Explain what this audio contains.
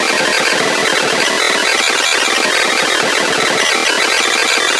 noise krazy synth riff
This krazy sound is made by LMMS only.
(osc-square, random arppeggiator play)
LMMS, noise, riff, TripleOSC